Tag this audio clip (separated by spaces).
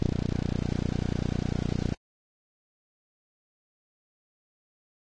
terrifying ambiance sound ambience